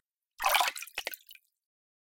Water pouring 8
Water, pouring, splash, water-drops